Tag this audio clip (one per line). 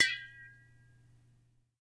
air
tin